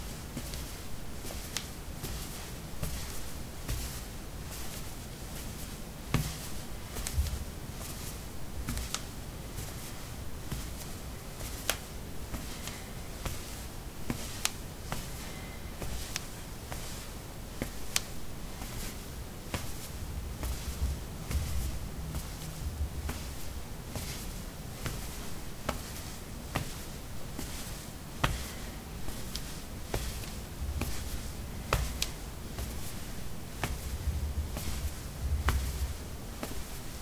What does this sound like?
ceramic 02a socks walk
Walking on ceramic tiles: socks. Recorded with a ZOOM H2 in a bathroom of a house, normalized with Audacity.